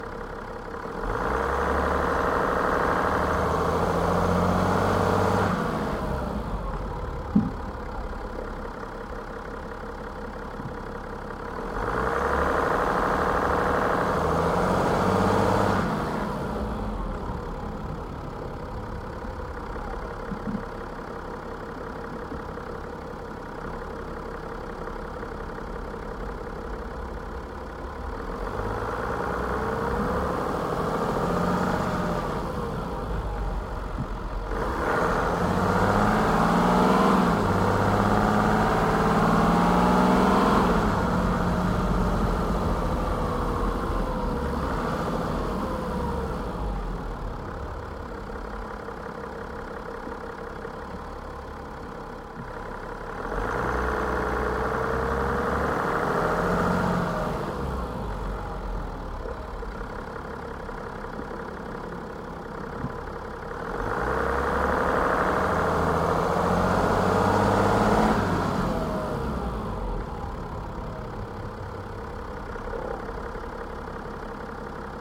BMW 640D Gran Coupe engine reverse mono
This ambient sound effect was recorded with high quality sound equipment and comes from a sound library called BMW F12 640D Gran Coupe which is pack of 119 high quality audio files with a total length of 179 minutes. In this library you'll find various engine sounds recorded onboard and from exterior perspectives, along with foley and other sound effects.